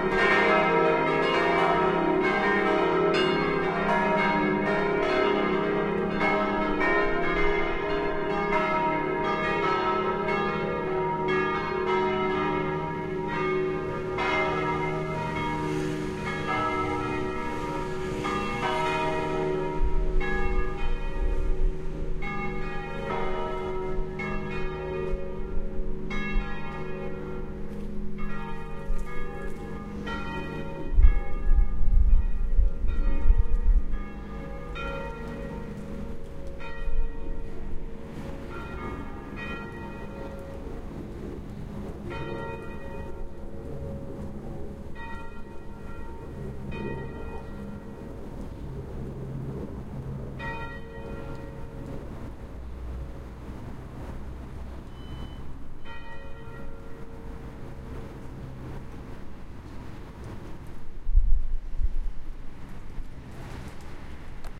Church Bells
ringing, cathedral, church-bells, church, bells